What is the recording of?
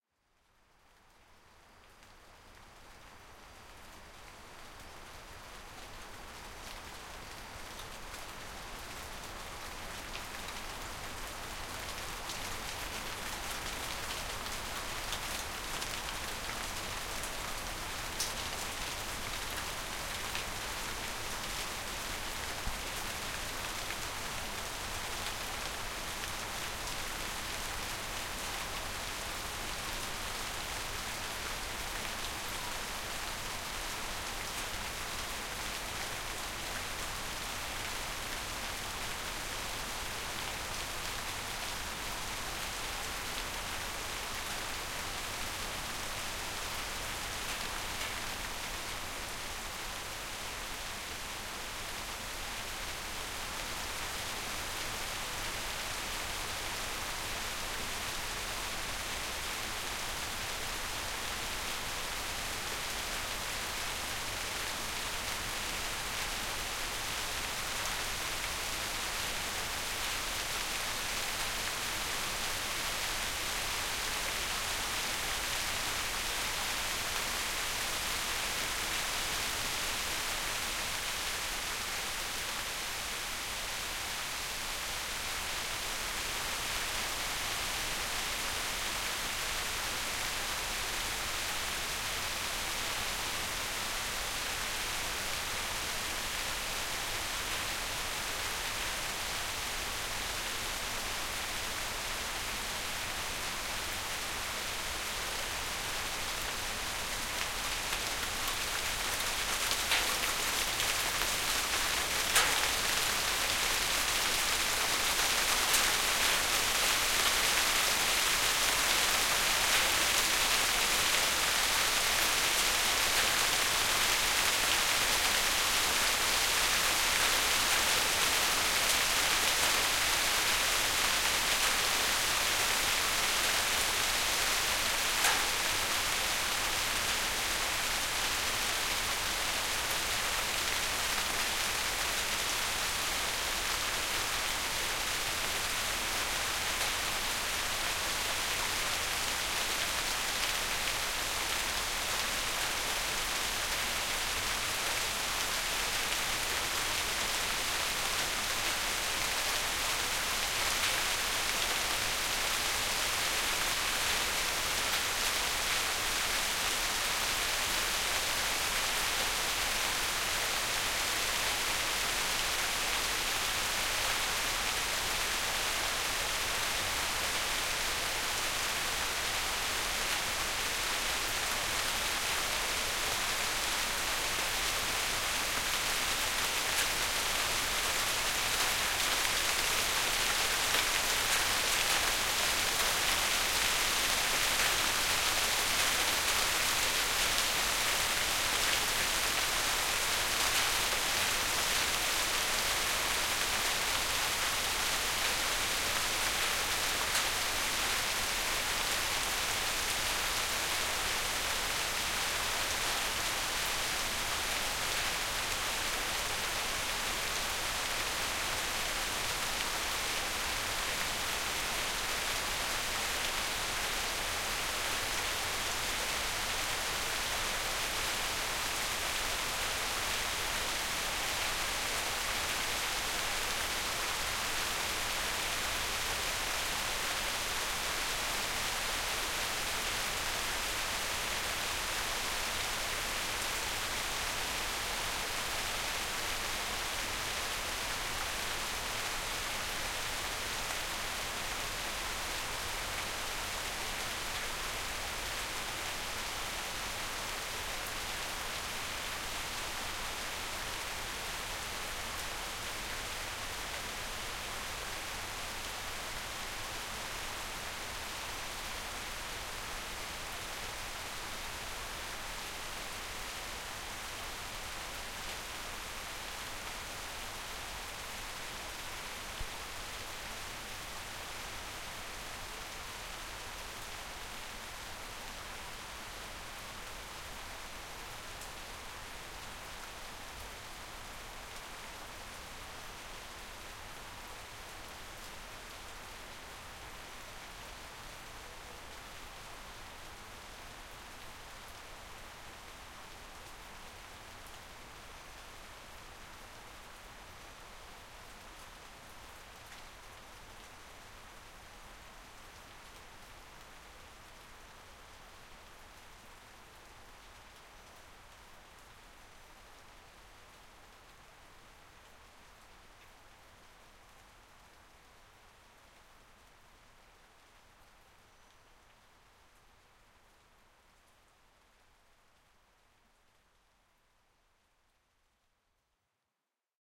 Birds on early (rainy) morning in the interior of Minas Gerais, Brazil.
belo-horizonte, bird, birds, brasil, brazil, cachoeiras, countryside, early-morning, field-recording, forest, minas-gerais, morning, nature, rain, rio-acima, rural, tangara